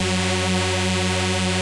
147 Octane hyphy synth 01
Octane hyphy synth
hyphy, synth